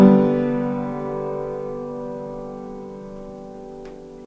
Me and a friend were allowed access into our towns local church to record their wonderful out of tune piano.